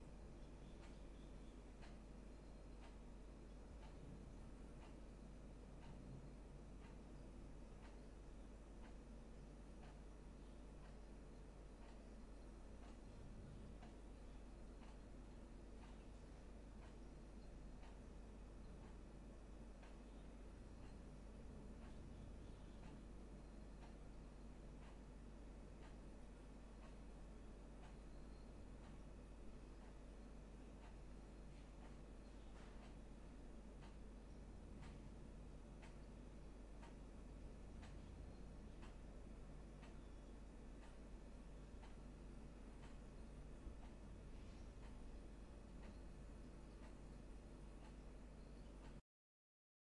Kitchen ambience
Ambiance recorded in a kitchen
ambiance, kitchen, little-noise, OWI